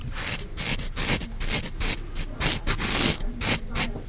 Scraping hard plastic against facial stubble.
plastic-scrape, scraping